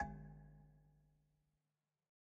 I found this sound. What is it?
Metal Timbale 002
record, timbale, god, pack, home, drum, trash, kit